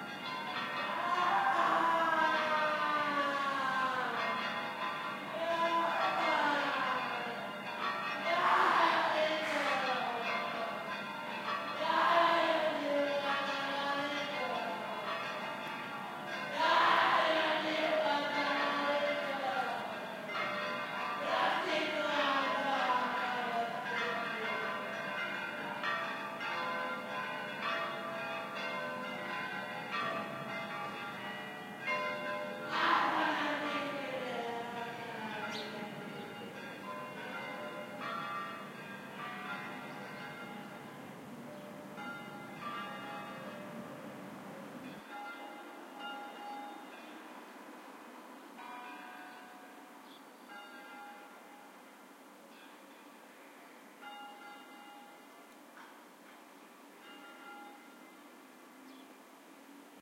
20071202.noise.and.fury
Shouting in sample 20071202.walking.shadow (with pan and volume modified) mixed with joyful pealing church bells. I did so because, well... cries triggered my thinking:
'... recorded time,
And all our yesterdays have lighted fools
The way to dusty death. Out, out, brief candle!
Life's but a walking shadow, a poor player
That struts and frets his hour upon the stage
And then is heard no more: it is a tale
Told by an idiot, full of sound and fury,
Signifying nothing